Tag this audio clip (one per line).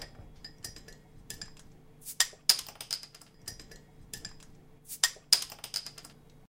beer
bottle